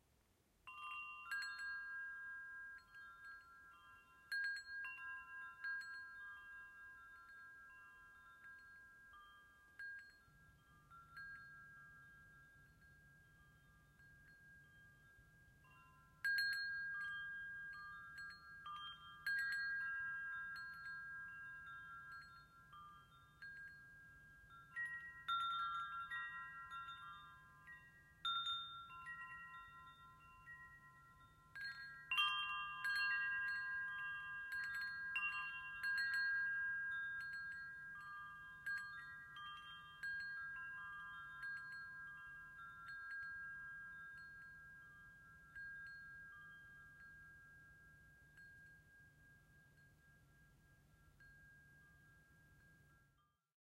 Wind chimes 3 (quiet)
Wind chimes chiming quietly. Good for background sounds. This was recorded in isolation as wind would have killed the recording.
metal, background, chimes, windchimes, clank, metallic, wind, ting, windchime, chime, foley